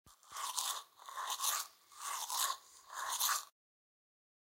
Great Axe Trap
A great swinging axe trap sound. Recorded myself washing my teeth as the basis for this sound. Cut and edited.